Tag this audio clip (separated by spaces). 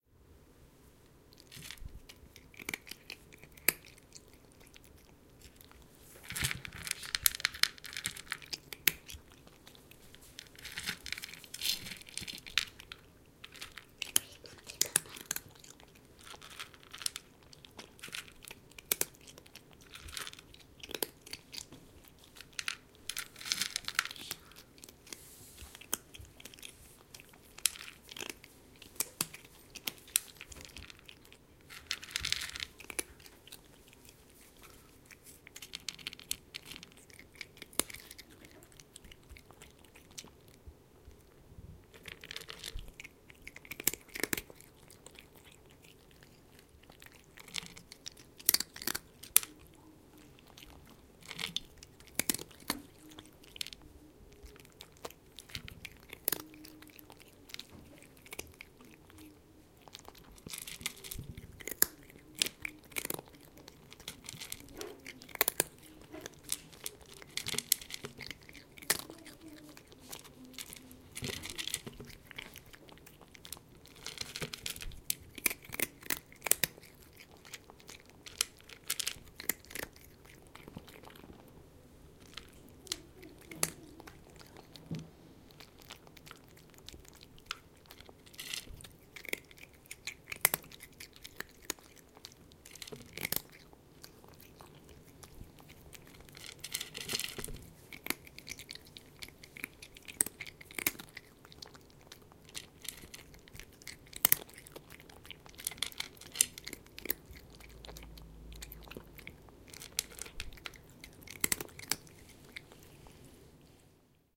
cat animal catfood eating dry